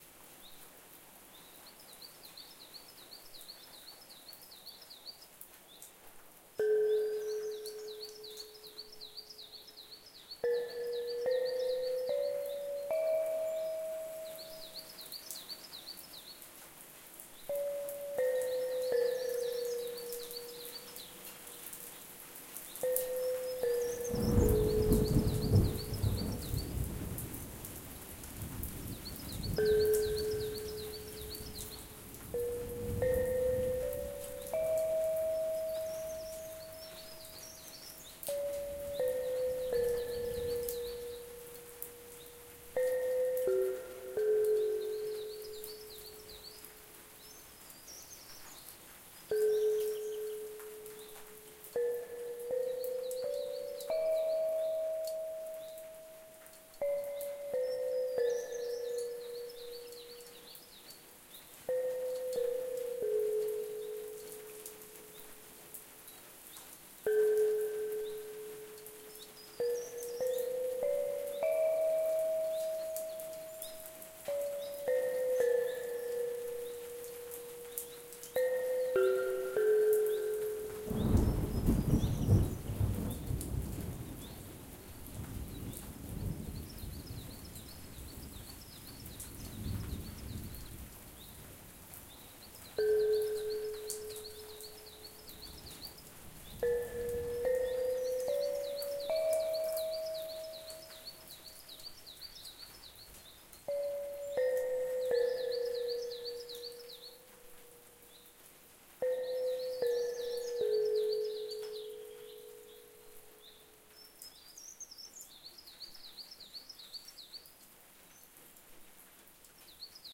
relaxation music #9
Relaxation Music for multiple purposes created by using a synthesizer and recorded with Magix studio.
I used sounds that aren't mine:
ambience, ambient, atmosphere, birds, field-recording, nature, rain, relax, relaxing, thunder, water